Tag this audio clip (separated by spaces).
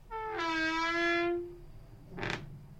door-creaking door creaking noise